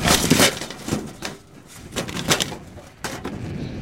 Metallic noises. A bit crushy, thrashy, breaking sounding. Recorded with Edirol R-1 & Sennheiser ME66.
break, breaking, breaks, crash, crashing, crush, crushing, iron, metal, metallic, noise, noises, thrashing, trash, trashing